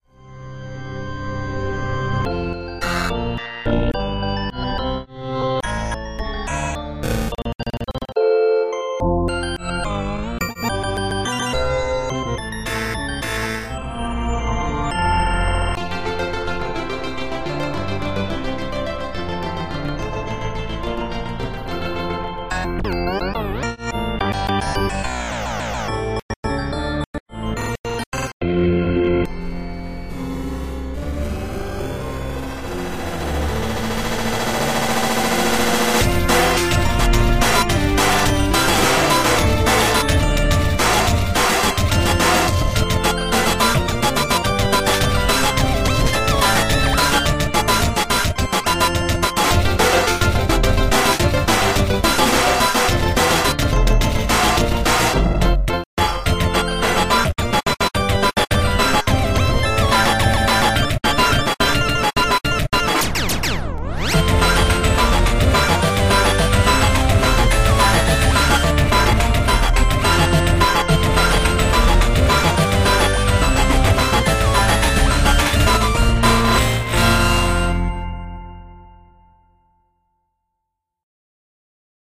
We Wish You a Merry Christmas Remix